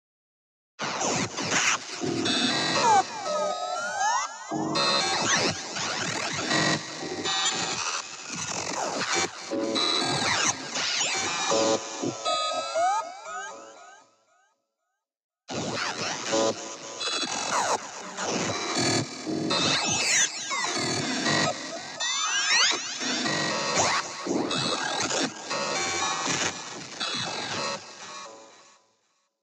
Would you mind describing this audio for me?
Error sounds
Sounds like the errors of a sci-fi computer